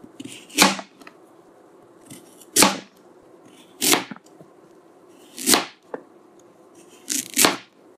snijden winterpeen
Chopping a carrot on a synthetic cutting board. Recorded with an iPhone 6.
carrot, chopping, cooking, cutting, kitchen, knife, vegetables